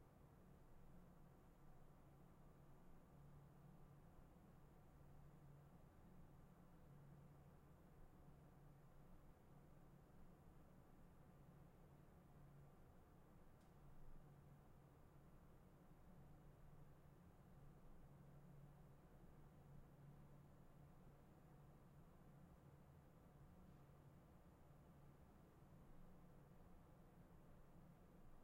Roomtone Large Building AC
large room with AC room tone